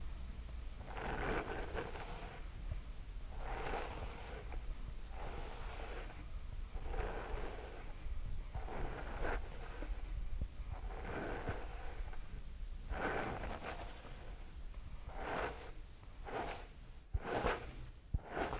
Coming Hair: You can hear the typical crunch of tangled hair being combed out. You can hear the hairbrush gliding through the hair. It varies in tempo and rhythm to add realism to this sound. As she struggles with the knots it is slow but picks up tempo as her hair becomes less tangled. Recorded with the Zoom H6, Rode NTG in a recording studio. Great for any make-over montage or scene.
brush, haircut, vanity